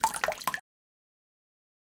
Small Pour 002
Splash, River, pouring, aquatic, Slap, Dripping, marine, bloop, blop, Run, wave, Running, Sea, pour, Drip, crash, Game, Movie, Lake, Water, aqua, Wet